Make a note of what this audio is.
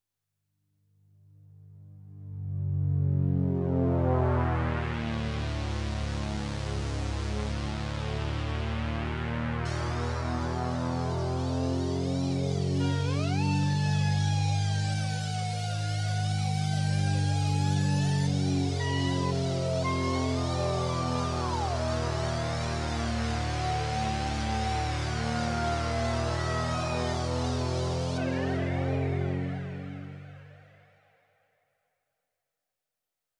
A chase in Metropolis
space vehicle chase with sirens in scifi city
Imposcar & KeysAlpha
2 Patches, layered. Glideeffect in Impocar used for pitching. The KeysAlpha (police sirens in the distance) is pitch-modulated with a fast LFO, and you'll find a fat chorus-effect there, an internal synth effect.